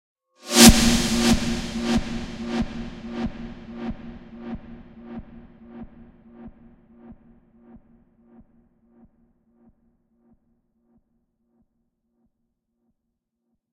SnareInReverse+DelayAmbient+RevbLargehall
electronic, FL, fx, loop, reverse, snare, studio, synth, tone